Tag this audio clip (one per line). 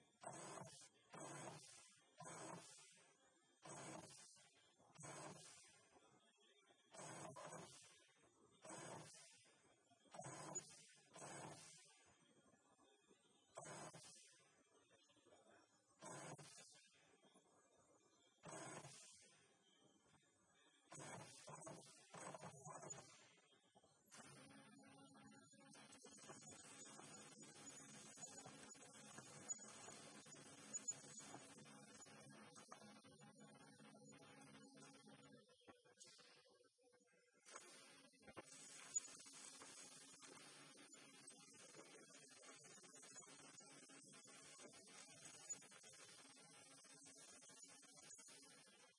household
office
printers